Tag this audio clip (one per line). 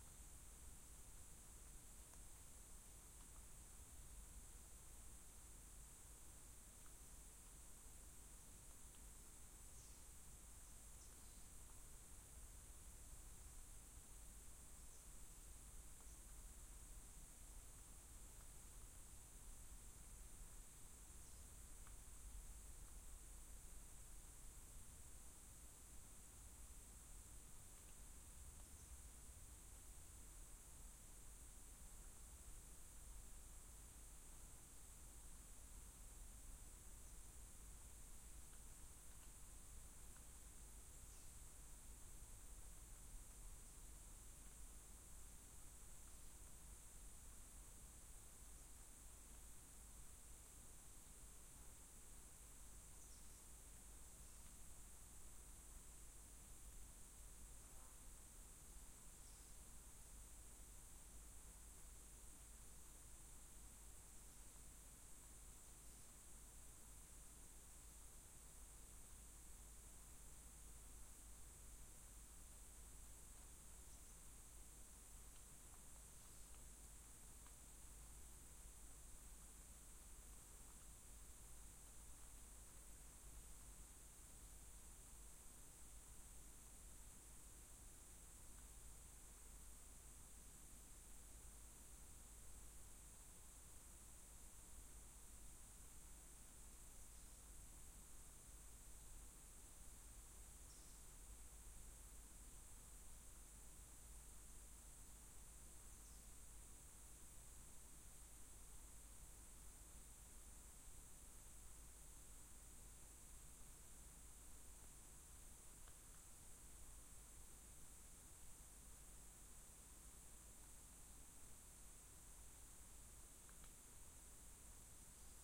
forest
insects
field-recording